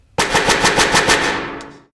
PARVY Lucas 2016 2017 Weapon
I tried here to reproduce the sound of a weapon. To realize that sound I record me crushing a can. Then I duplicate this sound (for each shot) and place all the copies very close to the first one to have a blast sound. Then I change the speed and the pitch to have something faster, and louder. I add a reverberation to give an impression of an interior shot (like in a hangar). At the end, I just change the amplitude to avoid to have a saturation.
Selon la typologie de Schaeffer, le son créé se rapproche d’un continu complexe, étant donné qu’il représente une seule et simple son que j’ai modifié et répété.
/////// Morphologie
Typologie : X
Masse: groupe de son tonique
Timbre harmonique: éclatant, strident
grain: son relativement piquant
allure: son net et perçan, pas de vibrato
dynamique : L’attaque est violente et abrupte
profil mélodique: variation scalaire, son décomposé en répétition